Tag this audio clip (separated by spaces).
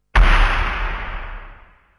flash low industrial